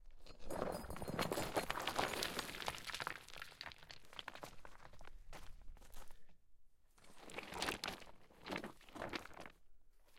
SFX Stone Calcit DeadSea Movement #2-185
glassy stones slightly moving
rattling, rocks, stone